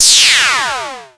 sfx-fuzz-sweep-1
Made with a KORG minilogue
effect, fx, synthesizer, game, sfx, sound